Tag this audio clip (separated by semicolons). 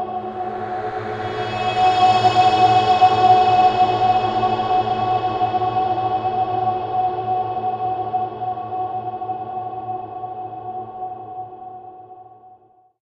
drone; deep-space; long-reverb-tail; ambient